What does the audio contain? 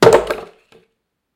Breaking wooden table
break, design, effect, game, garbage, rubbish, sfx, sound, sounddesign, sticks, table, wood